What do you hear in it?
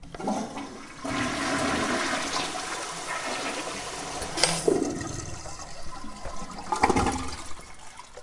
Bathroom Toilet02
toilet, flush